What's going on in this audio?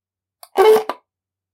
Straw being inserted into a takeaway drink.
beverage, bottle, break, cola, cold, container, drink, drinking, fast, fastfood, food, ice, plastic, pop, squash, straw, takeaway, takeout, water